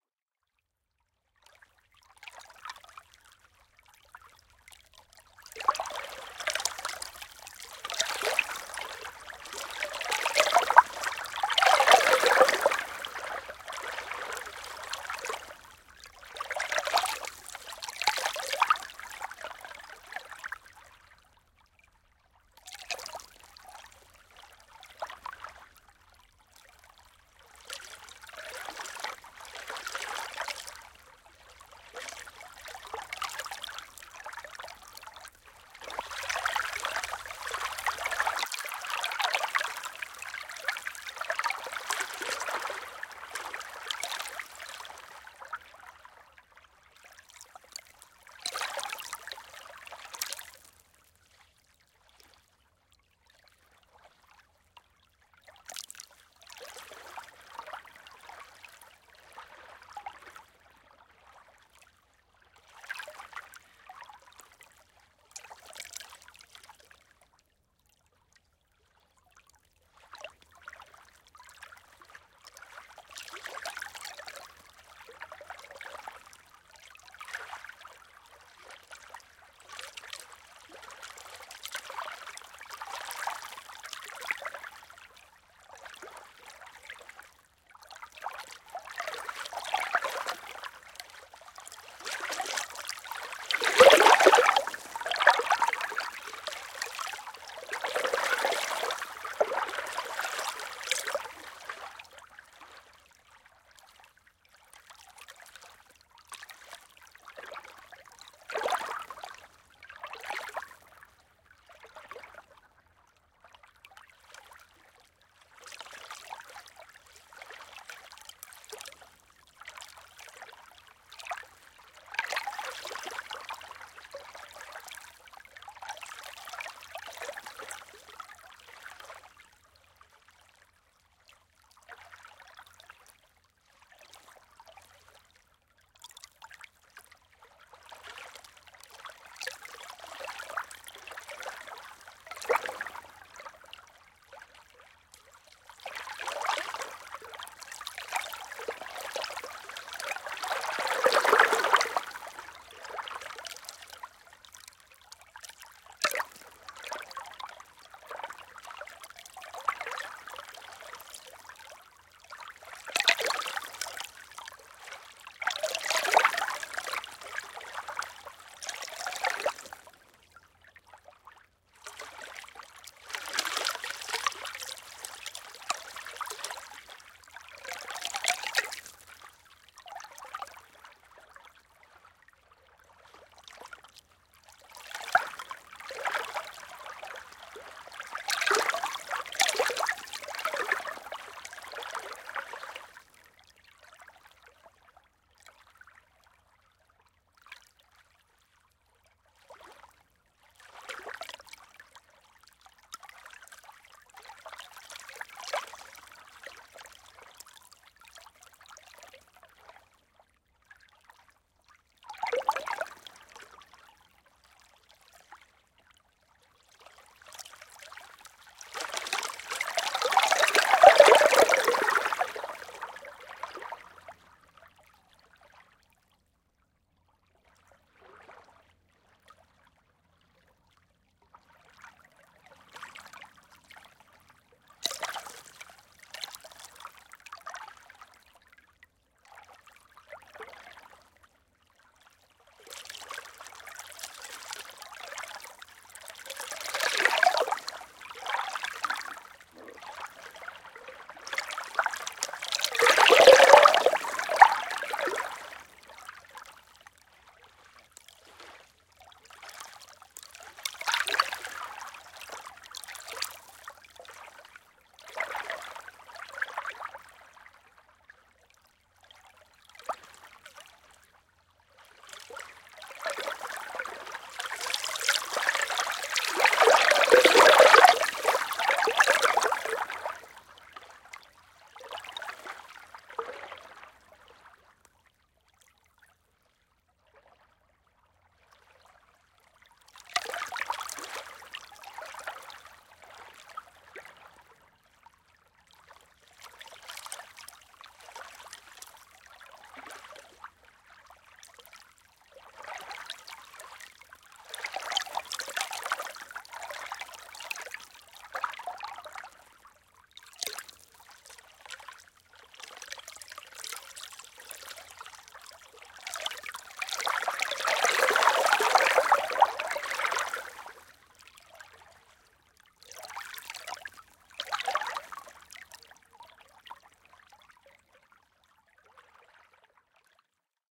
Lake Waves - 2014
Recorded on 3-20-2014 with 2 NT1A mics and a Tascam DR-680 (Busman mod). Mic angle/spacing: 120°/21cm. Mics were 2 ft above water. Recorded around 2 am while things were pretty quiet. Winds were light, temps ~50°F. South-west side of lake sam rayburn in east texas. Not much to say about this one, just some nice waves, not too many boats that night, turned out descent.
Downloaded version is full quality.
Enjoy!